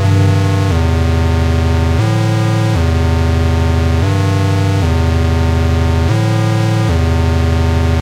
Very hard synth lead from a MicroKorg. Abrasive harsh sound.